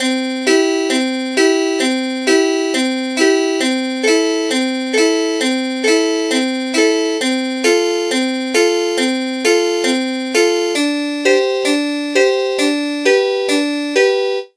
Loop created with softsynth. Tempo if known is indicated by file and or tags.